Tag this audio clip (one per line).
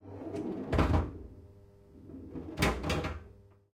Gottlieb
bar-athmosphere
bronco
Scarlett-18i20
bumper
game
arcade
Neumann-KM-184
Zoom-H2N
pinball
gameroom
Focusrite
plunger
flipper